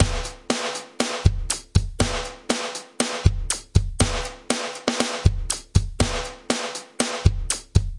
Raw Power 004
Produced for music as main beat.
loops, rock, raw, industrial, drum